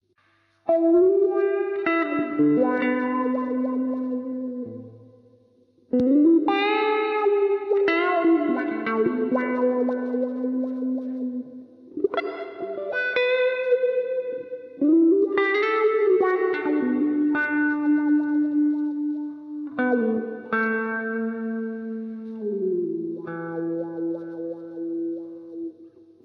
Wah Wah 6
Easy song, with using guitar effect Wah wah
chord, Echo, electrik, Experimental, Guitar, melody, Noise, Reverb, Solo, Wah